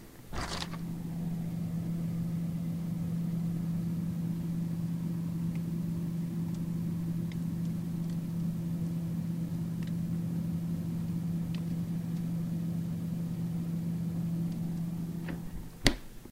The freezer/refrigerator door opens and we hear its indoor room tone and then it shuts.